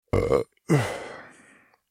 Belch and exhale recorded on RE-20 in treated room.
Thank you for using my sound for your project.
gross, male, belch, human, food, beer, rude, exhale, pub, burp, drunk, restaurant, man, bar, drink, eating